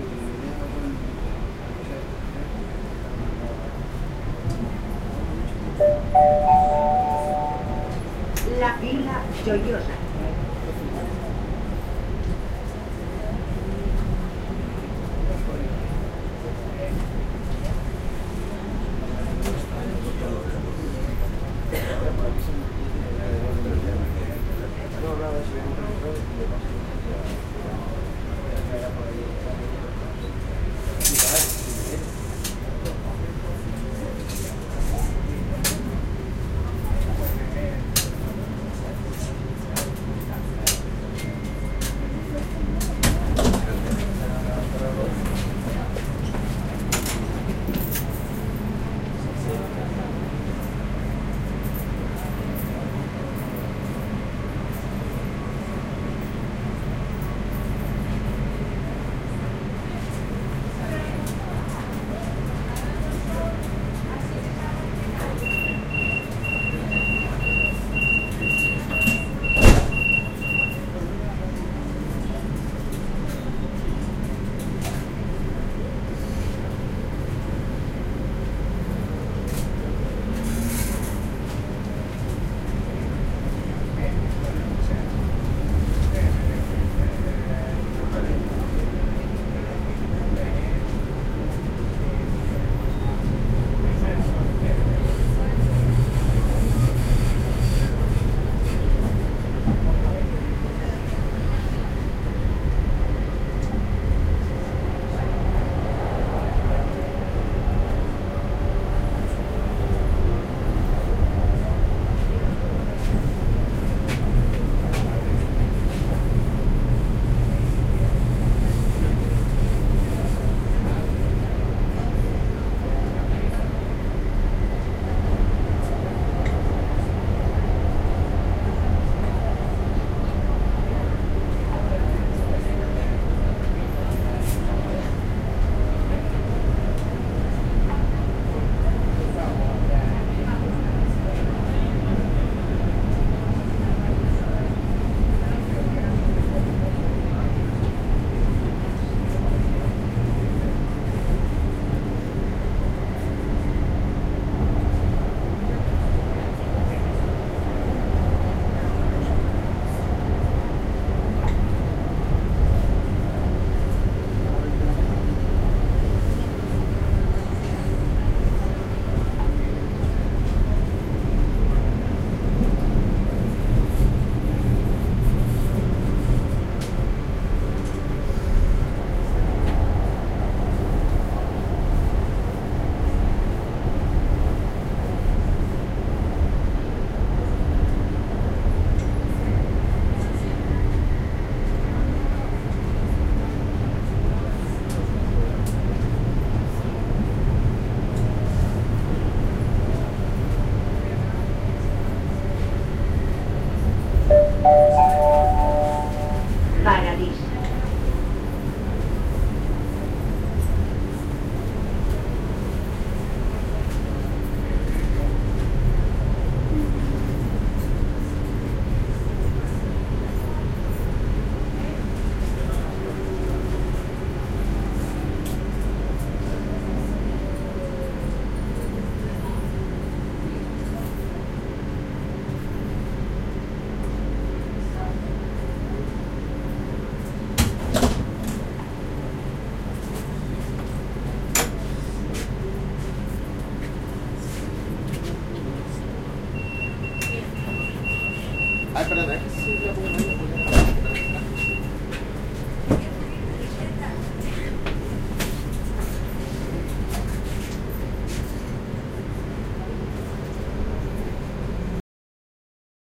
TRAM Metropolitano de Alicante
Recorded with a Zoom H5 on a tram L1 between Benedorm and Alicante approaching and passing the following stations La Vila Joiosa, Paradís and Venta Lamus at around 6pm 5th April.
jingle, Spain, tram-doors, Tram